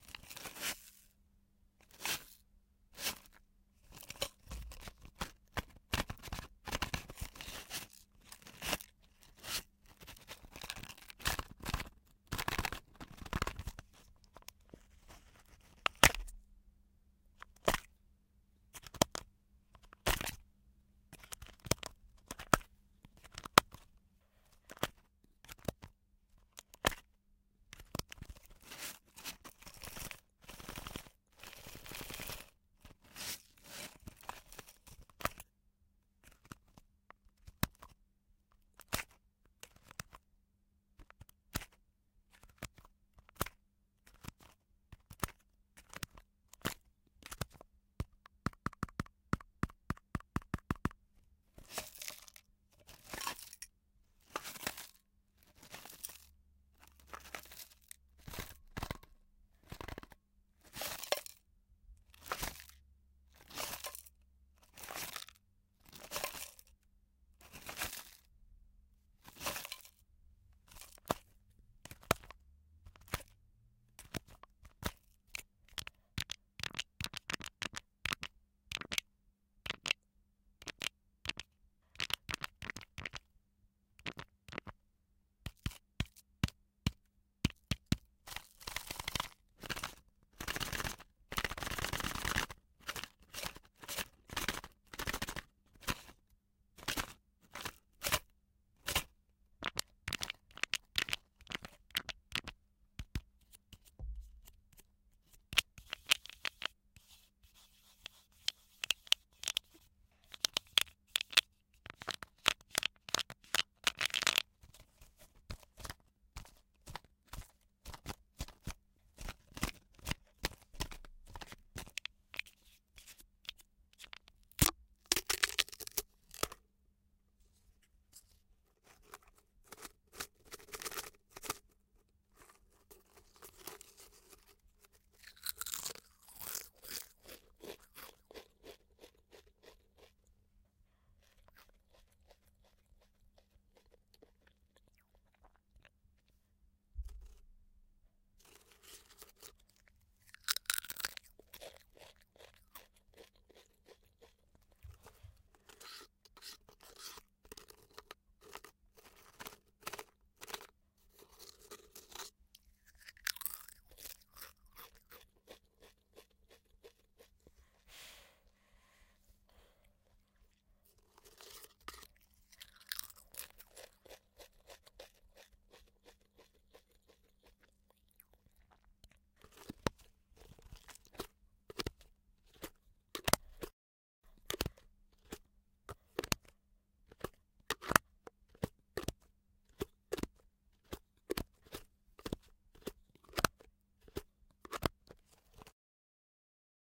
eating chips round can opening reverse shuffle
Played around with a quite popular cylindric potato chips package and tilt and overturn the package and at the end i eat some.
can, crunch, crunching, crushing, eat, eating, opening, potatochips